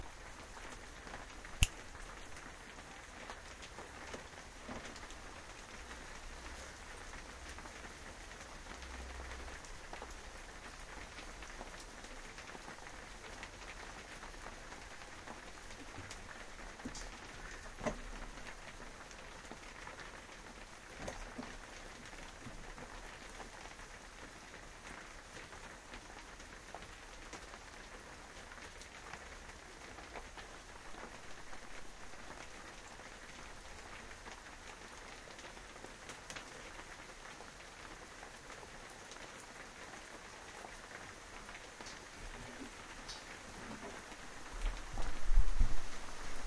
Rain after a thunderstorm in Glasgow, Scotland. Microphone positioned inside a shed with the door open
Recorded on an iPhone 4S with a Tascam iM2 Mic using Audioshare App.